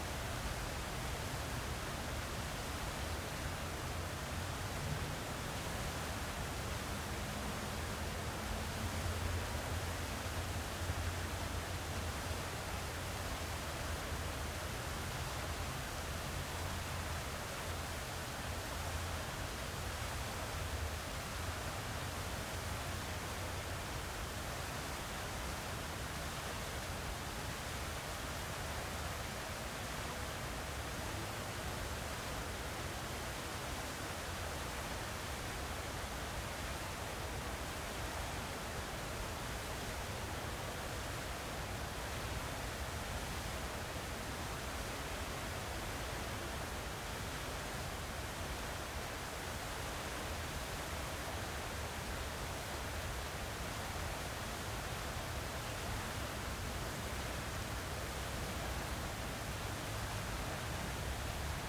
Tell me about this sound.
Franklin Square-Fountain
Fountain in a park with some traffic background